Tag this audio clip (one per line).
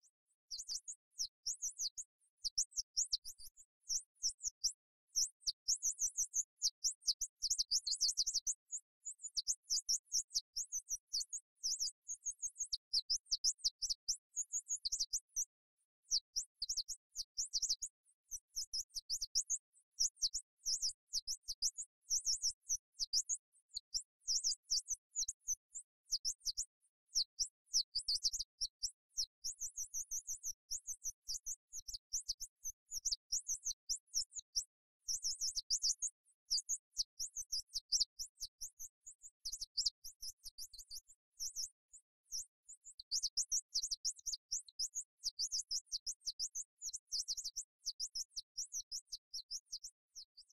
Singing
Wildlife
Bird
Natural
Song
Nature
Tweeting
Sounds
Birds